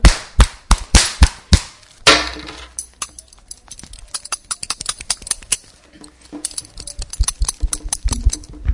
Sounds from objects that are beloved to the participant pupils.
france, MySound, CityRings, labinquenais, rennes